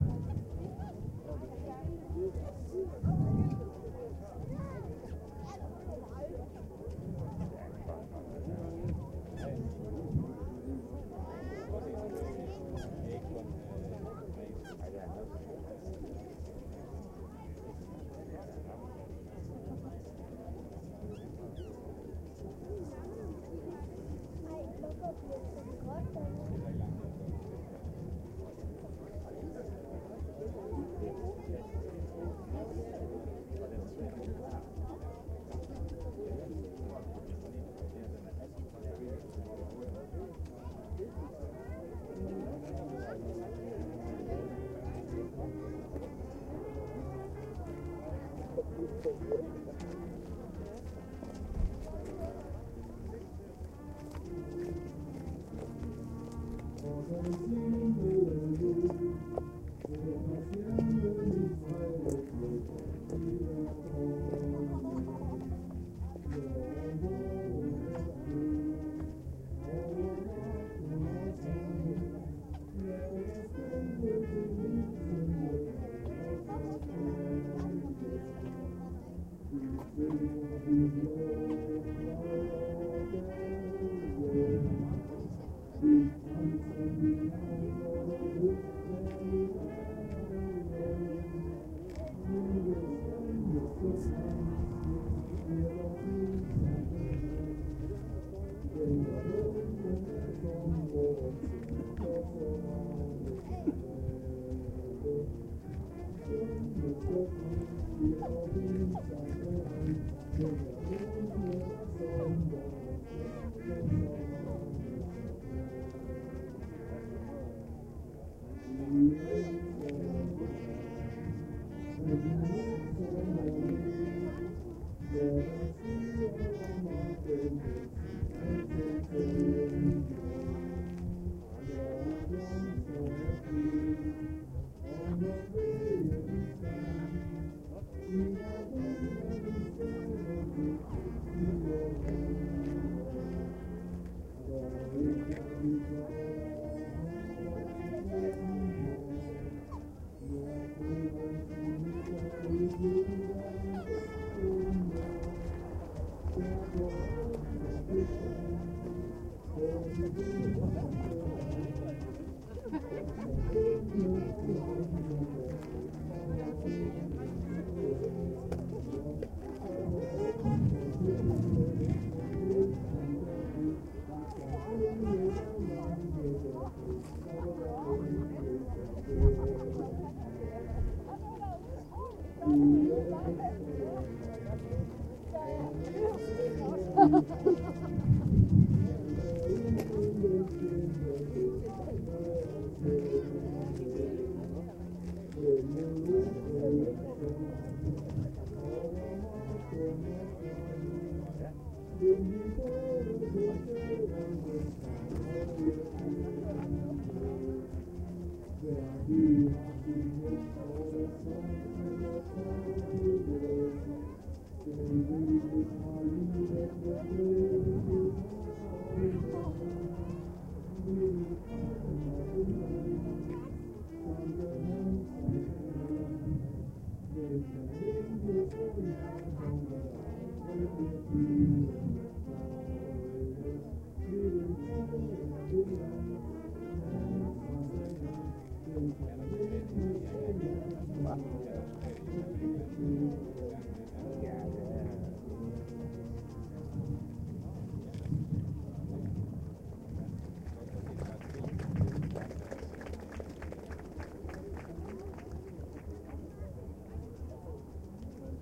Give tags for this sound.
celebrate band midsummer mid-summer song jutland

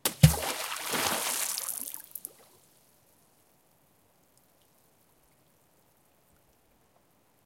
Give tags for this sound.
splash splashing bloop percussion water

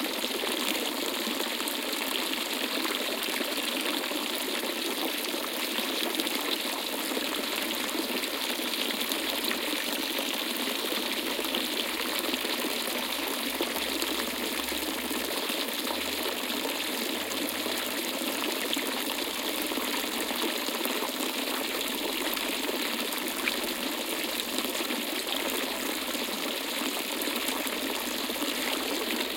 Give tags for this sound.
water binaural

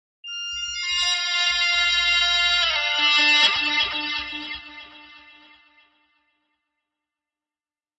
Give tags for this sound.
ambient delay distorted guitar

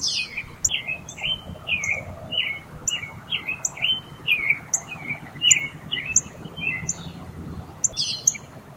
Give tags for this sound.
field-recording outdoors nature birds ambience

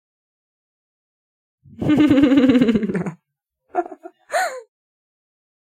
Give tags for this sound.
final risa sonido

